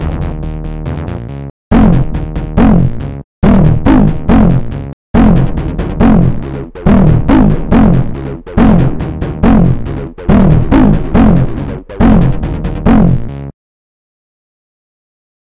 Infiltration music punk for your indie game
🌟 Podcast of free content 🌟
βœ… Join us on π —¬π —Όπ ˜‚π —§π ˜‚π —―π —²:
βœ… π —§π —²π —²π ˜€π —½π —Ώπ —Άπ —»π —΄ store:
βœ… π —™π —Ώπ —²π ˜€π —Όπ ˜‚π —»π —± page (foley for beginners):
❀️𝗦𝗨𝗣𝗣𝗒π —₯𝗧 𝗨𝗦 π —ͺπ —œπ —§π —› 𝗔 π —Ÿπ —œπ —žπ —˜ 𝗔𝗑𝗗 𝗦𝗛𝗔π —₯π —˜!
16-bits, 8-bits, action, beat, bit, bso, drum, indie-game, infiltration, loop, music, punk, theme, videogame